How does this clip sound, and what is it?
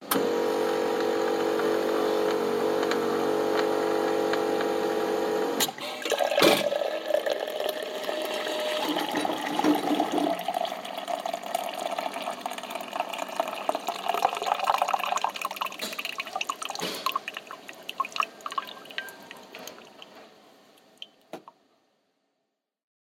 Coffee machine brewing